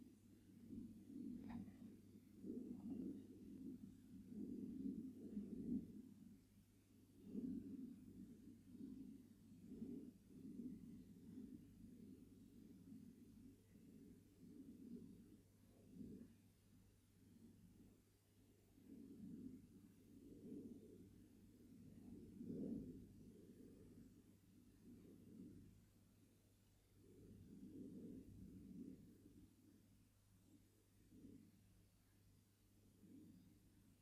A fighter jet flying in the distance. Recorded in my garden at noon.
Recorded with a superlux E523/D microphone, through a Behringer eurorack MX602A mixer, plugged in a SB live soundcard. Recorded and edited in Audacity 1.3.5-beta on ubuntu 8.04.2 linux.
aeroplane, away, distant, far, fighterjet